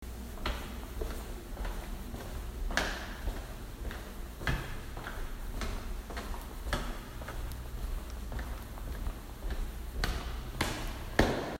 Steps on hard ground with some room echo
echoed, steps